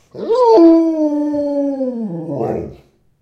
A recording of my Alaskan Malamute, Igor, while he is waiting for dinner. Malamutes are known for their evocative vocal ability. Recorded with a Zoom H2 in my kitchen.
wolf, bark, husky, howl, malamute, growl, moan, sled-dog, dog